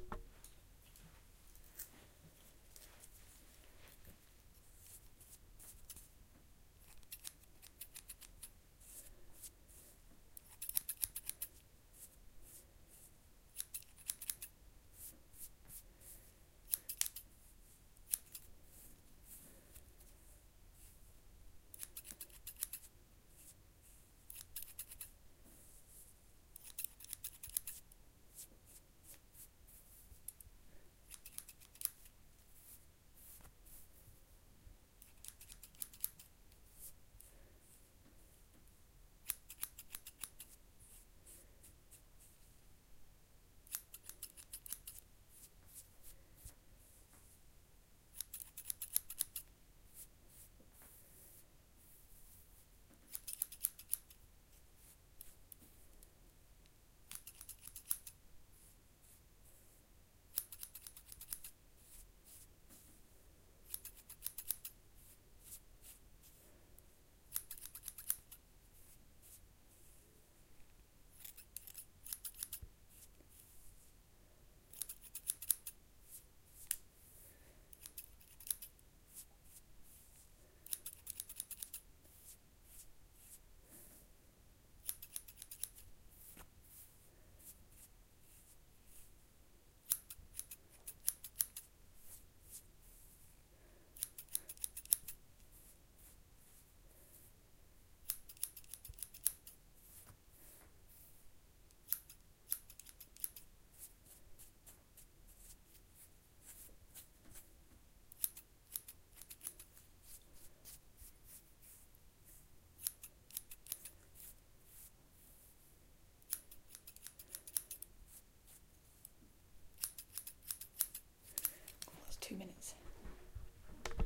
Close snipping of short hair, with combing. Light breathing, no other sound.